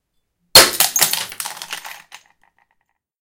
Glass Smash, Bottle, E
Raw audio of dropping a glass bottle on a tiled floor.
An example of how you might credit is by putting this in the description/credits:
The sound was recorded using a "H1 Zoom V2 recorder" on 19th April 2016.
break, tile, smash, crash, glass, smashing, breaking, bottle, shatter